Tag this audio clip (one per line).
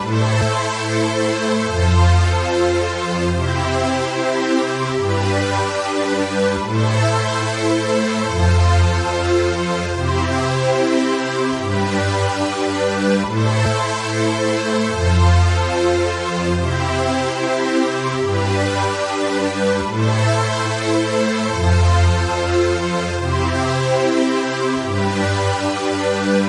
ambient
electronic
loop